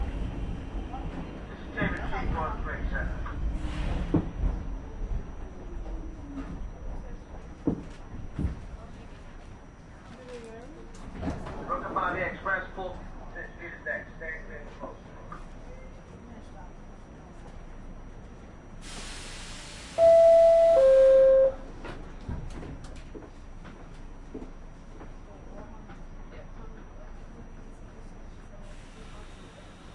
Subway operator announcement, interior recording, pressure release